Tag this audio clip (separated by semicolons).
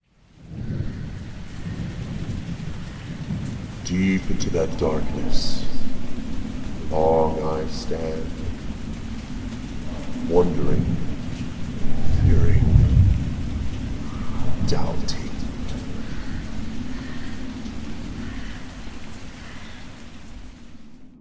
Edgar; fearing; Poe; raven; wondering